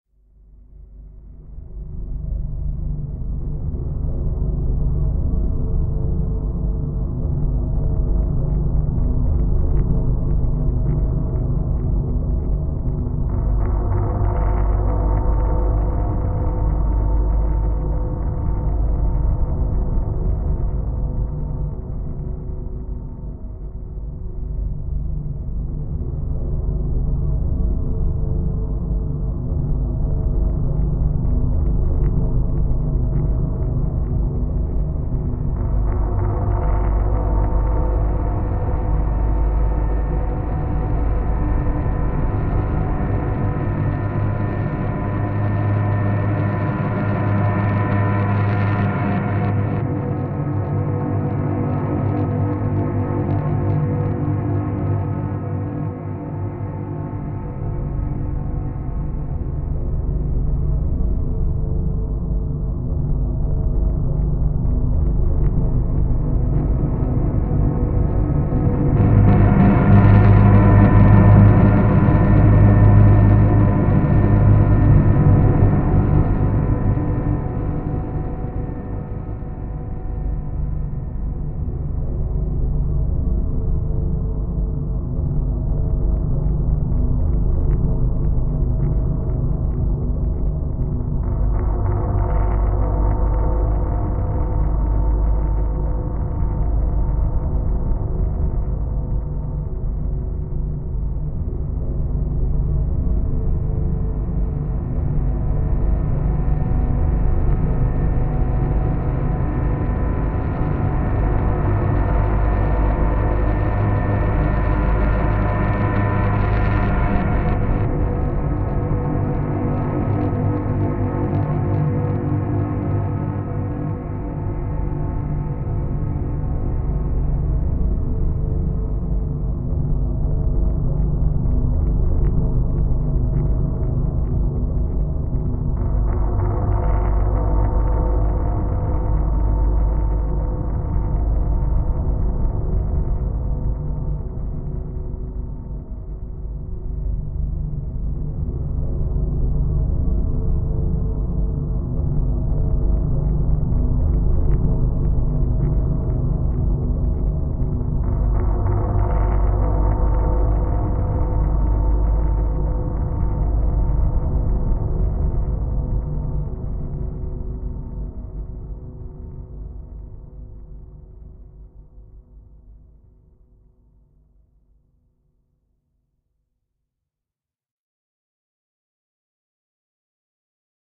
ambient sounds 002
It's been a while since I uploaded, let alone made something... enjoy the free creepiness.
Fully made with a 7-string electric guitar, a Line 6 Pod x3, lots of sampling and VST effects
film, creepy, lovecraftian, scary, suspense, dark, ambient, filter, fear, background, spooky, illbient, game, guitareffects, guitar, ambience, fx, terrifying, terror, soundesign, unearthly, texture, soundtrack, monstrous, movie, horror, alien, effect, drone